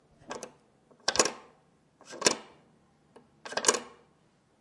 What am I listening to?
metal latch window or door lock catcher flip back and forth1
forth, flip